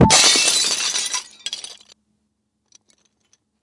The sound of glass being smashed by an object. Filtered through Sound Forge to remove unwanted noise.